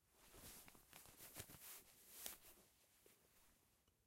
Tying handkerchief 1
Tying a handkerchief.
tying, rope, knot, handkerchief, tie